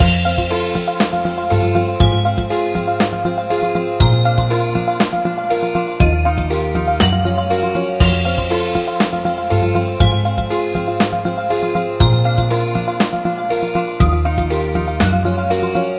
A music loop to be used in storydriven and reflective games with puzzle and philosophical elements.